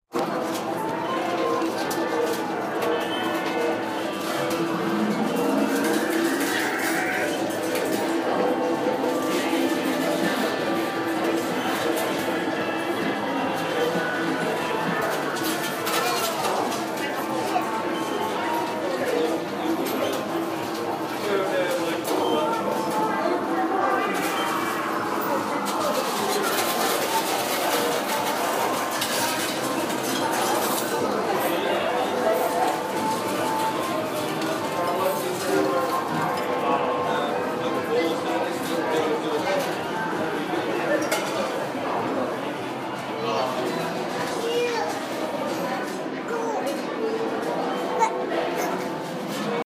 Arcade ambience in an amusement arcade.
Festival organised by the Norfolk and Norwich Sonic Arts Collective and originalprojects